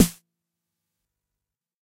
various hits 1 115
Snares from a Jomox Xbase09 recorded with a Millenia STT1
snare, 909, drum, jomox, xbase09